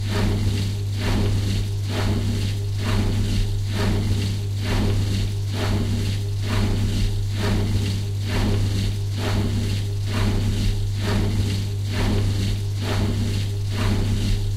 loop lavadora centrifugando washer machine spin dry
Lavadora centrifugando, audio cortado como un loop.
Washer machine spin-dry, loop
Grabado con el mic XY del Zoom H6
centrifugando, home, Lavadora, loop, spinning